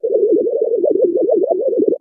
Created with an image synth program, these are modified images of brainwaves set to different pitch and tempo parameters. File name indicates brain wave type. Not for inducing synchronization techniques, just audio interpretations of the different states of consciousness.
brain, image, synth, sythesized